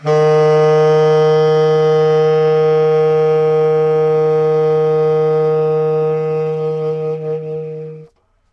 Baritone eb3 v105
The third of the series of saxophone samples. The format is ready to use in sampletank but obviously can be imported to other samplers. The collection includes multiple articulations for a realistic performance.
sax, vst, woodwind, jazz, sampled-instruments, baritone-sax, saxophone